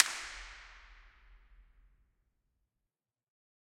3AUC IR CLOSE 001
These samples were all recorded at Third Avenue United Church in Saskatoon, Saskatchewan, Canada on Sunday 16th September 2007. The occasion was a live recording of the Saskatoon Childrens' Choir at which we performed a few experiments. All sources were recorded through a Millennia Media HV-3D preamp directly to an Alesis HD24 hard disk multitrack.Impulse Responses were captured of the sanctuary, which is a fantastic sounding space. For want of a better source five examples were recorded using single handclaps. The raw impulse responses are divided between close mics (two Neumann TLM103s in ORTF configuration) and ambient (a single AKG C426B in A/B mode pointed toward the roof in the rear of the sanctuary).
response, impulse, location-recording, avenue, choir, united, third, church, sanctuary